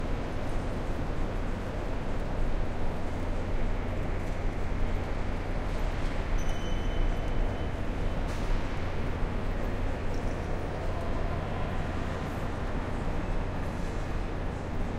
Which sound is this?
15 second room tone track matching the flapboard samples captured on September 20, 2006 in the main waiting room of Amtrak's Philadelphia, PA (USA) 30th Street rail station. Equipment used was a pair of MKH-800 microphones in a mid-side arrangement (hyper-cardioid and figure-8) and a Sound Devices 744T digital recorder.
room-tone, ambient, sign, train-station, waiting-room, solari, flap, flapboard, field-recording, board, airport